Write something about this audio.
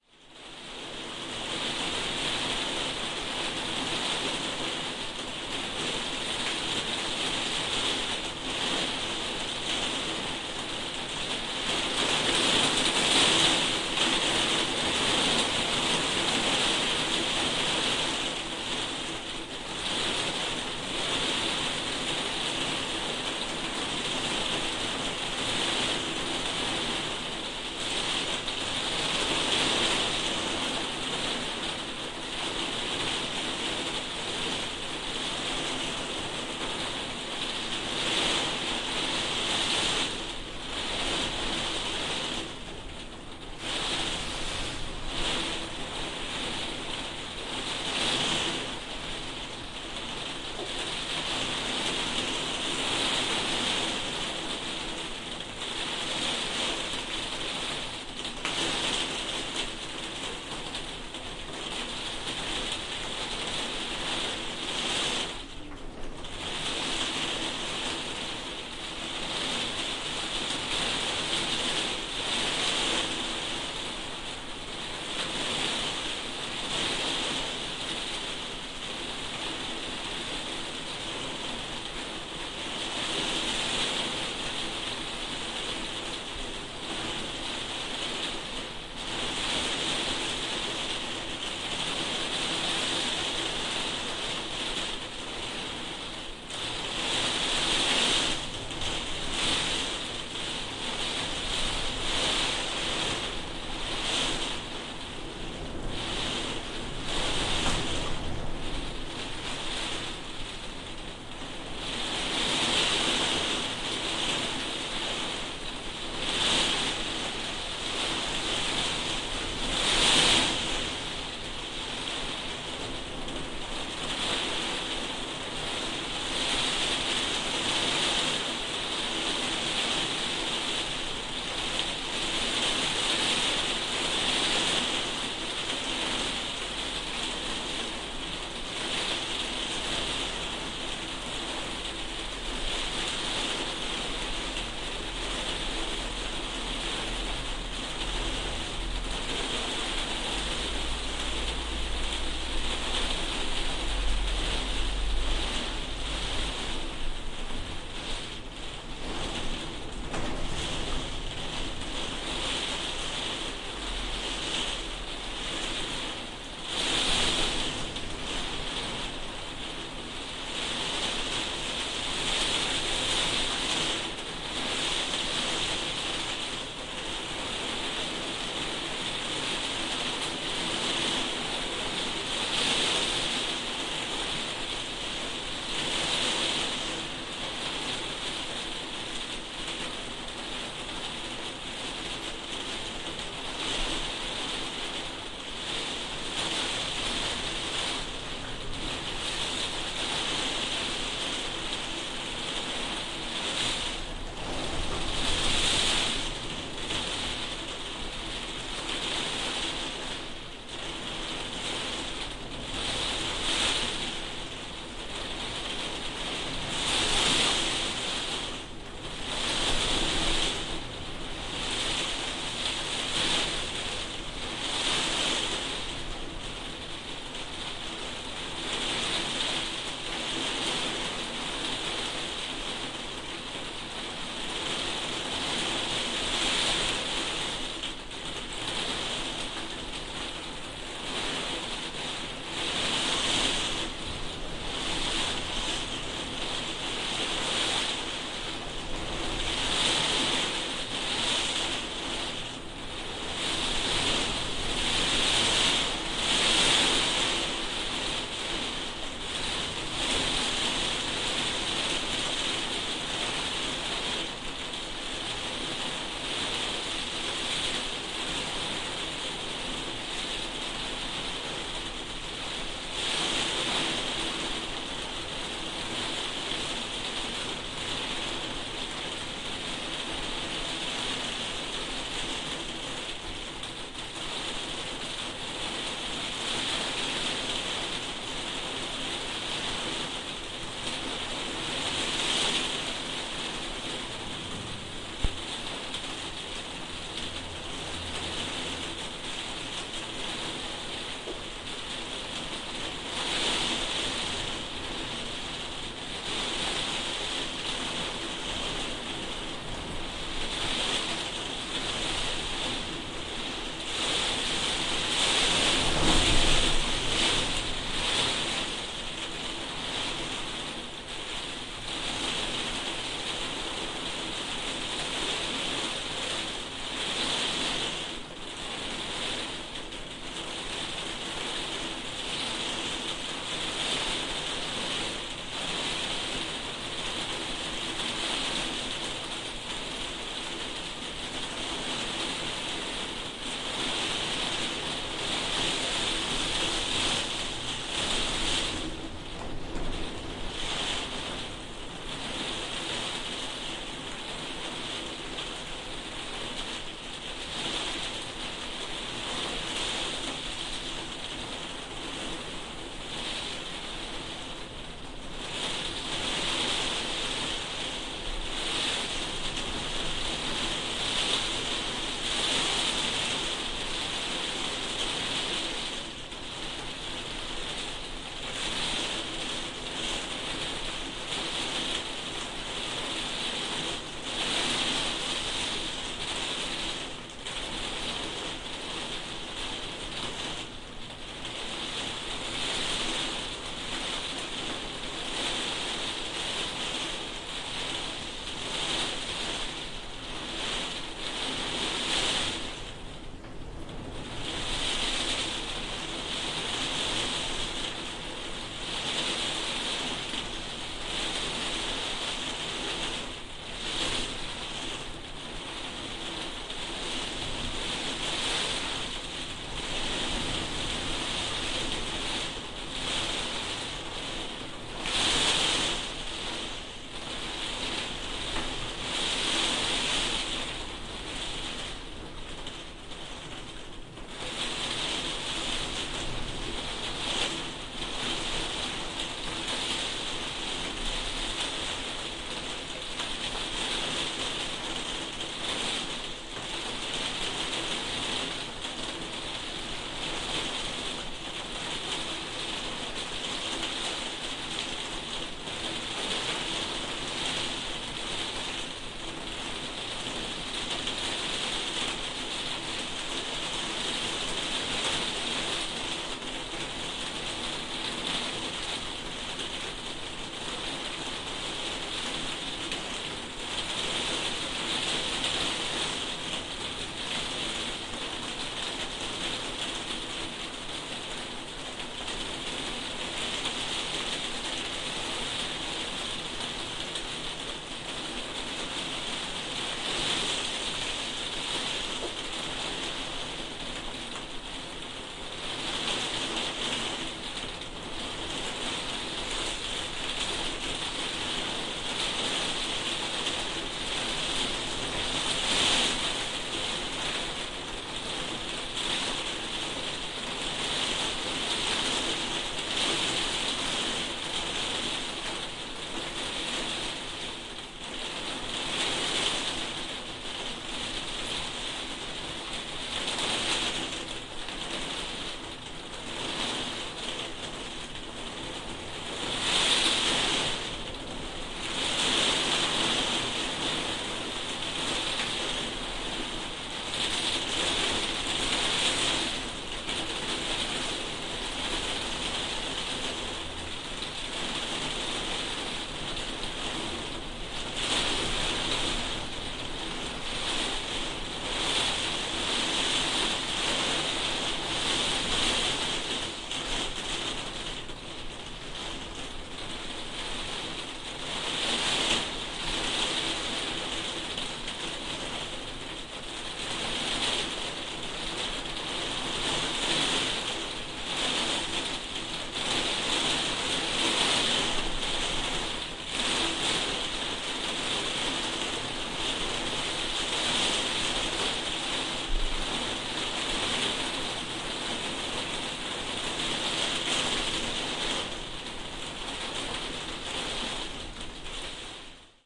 Heavy Rain On Plastic Roof
Rain on the plastic roof of a small outbuilding. There's an occasional light wind howl too.
nature, storm